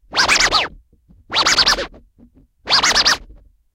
Simple vinyl record scratches using a turntable.